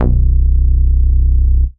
SYNTH BASS SAW